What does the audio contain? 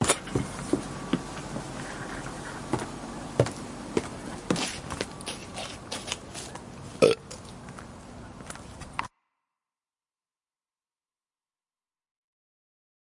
burp after walking down the steps
me burping after walking outside my house.